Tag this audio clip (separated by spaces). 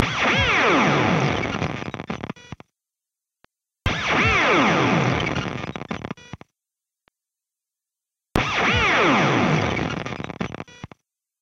amp-VST noise virtual-amp